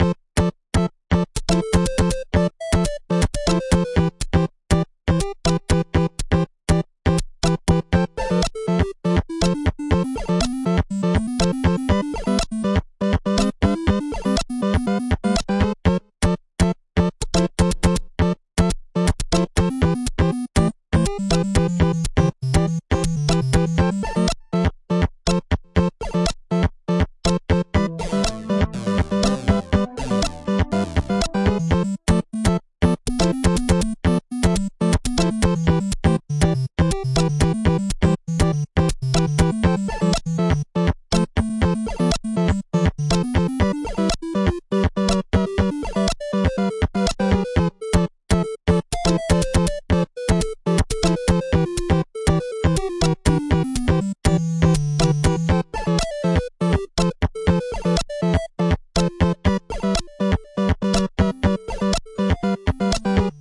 Lost Moon's =-= Greatful Eight's
8bit, retro-sounding practice rounds and sounds + some sifting's of past funtimes... enjoy!
2013, 8bit, blix, chip, laboratory-toy-toons